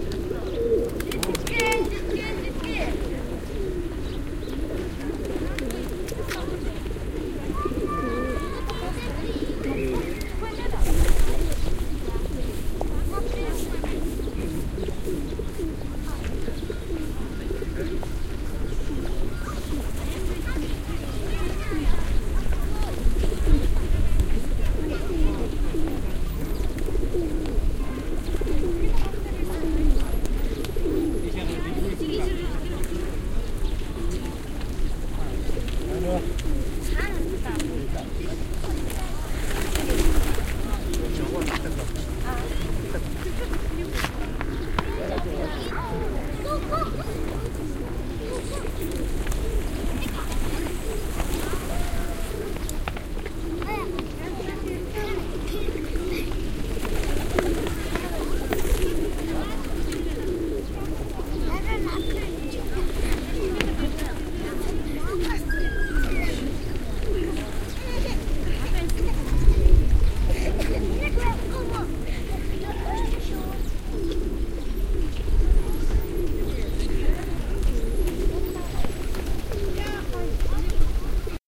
I stood amongst a large group of pigeons that were being fed and chased by others. Recorded with The Sound Professionals in-ear mics into a modified Marantz PMD661.